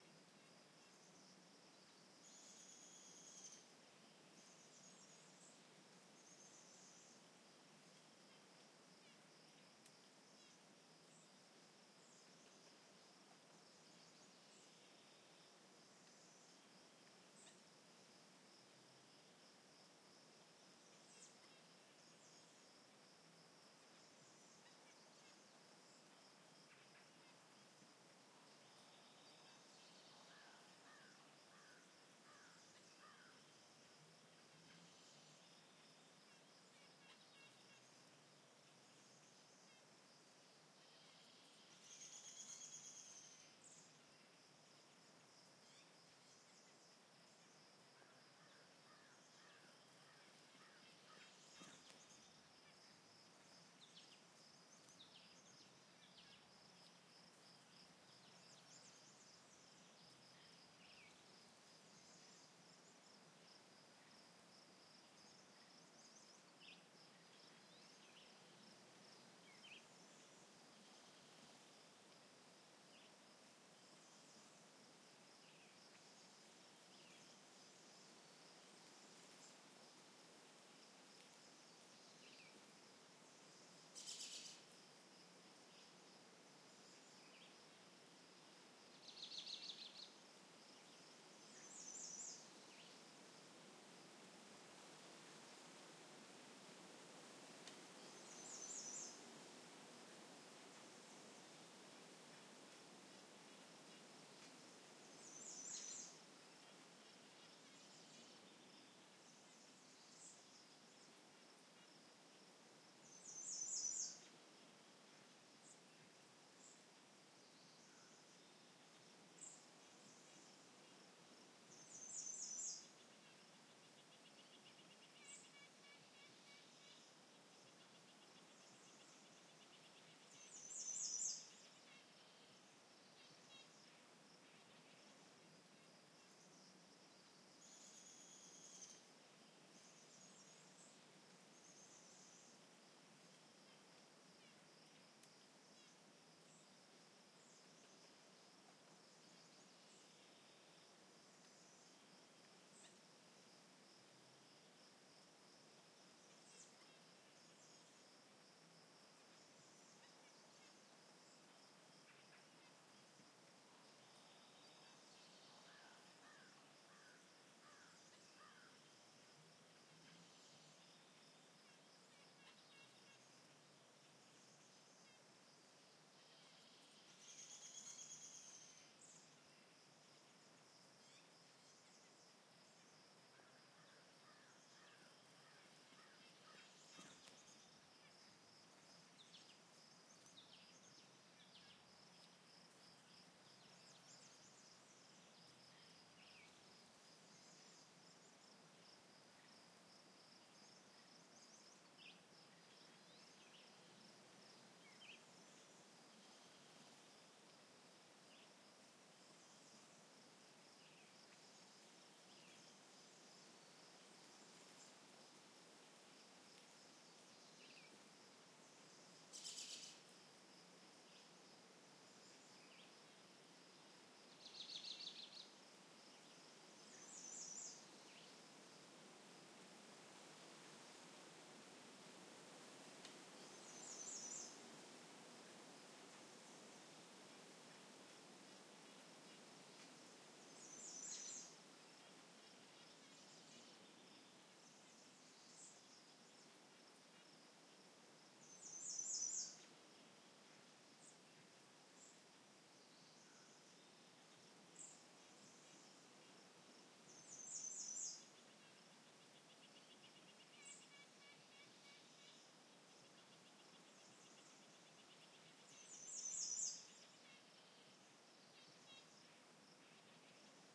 forest daytime with birds and bugs 01
stood in the woods a little longer by Sharps Campground, Valentine Nebraska
birds, breeze, bugs, daytime, field-recording, forest, peaceful, stereo, wind